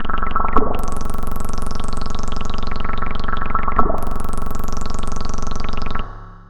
Wet analog LFO
Arp2600 LFO/reverb
analog, arp2600, lfo, rain, resonant, synth, synthetizer, water, wet